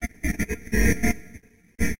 photo file converted in audio file
photo, audio